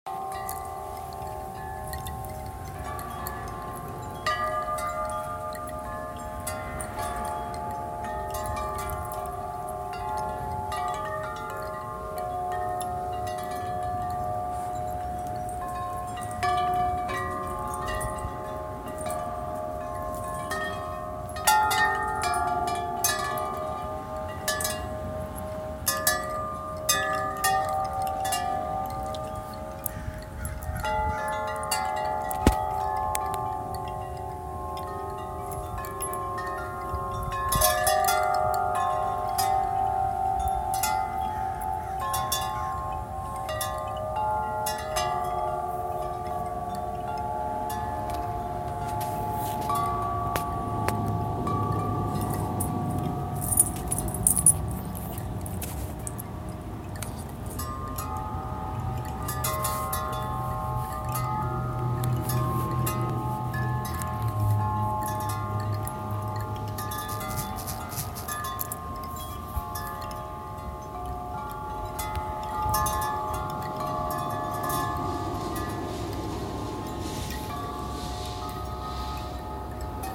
Recorded in my meditation garden at The White Lotus Lounge.
Completely FREE!
Nature, Meditation, Windchimes, Ambience, Relaxing